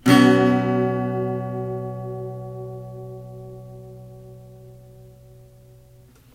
student guitar chord 11
A full octave of basic strummed chords played on a small scale student acoustic guitar with a metal pick. USB mic to laptop. They got mixed up, tag them with me with the root note, please... thanks.